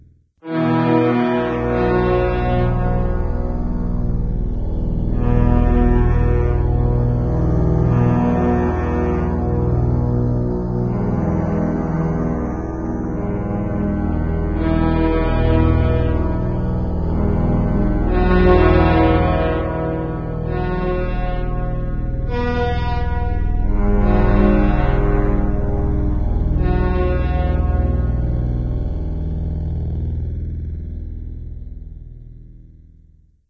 Orchestral 2of5
An emotional mixture of brass and strings. The notes of these short compositions were picked entirely at random, but produce an intelligent and interesting, classical feel.
ambient, classical, composition, dramatic, emotional, epic, instrumental, interlude, orchestral, track